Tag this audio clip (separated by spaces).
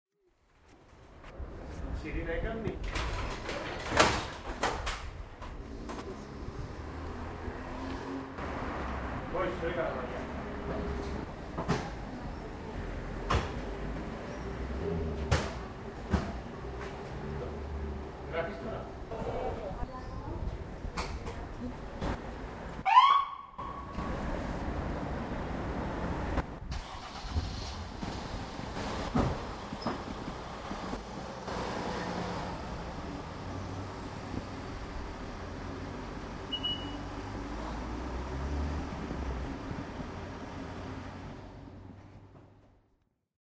polyclinic limassol siren